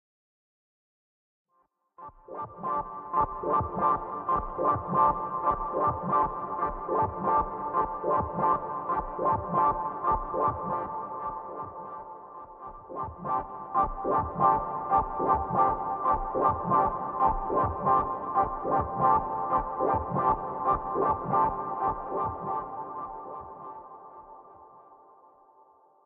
A synth chord with a resonant filter that erupts rhythmically due to an LFO sequence routed to the filter. Made with Native Instrument's Massive digital synth.